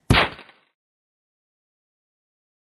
metal-dirt-step
Used as a footstep for a medium-small sized robot. Manipulating length and pitch of this sample in small increments can do enough to create variety within a sequence of footsteps!
steps, footstep, medium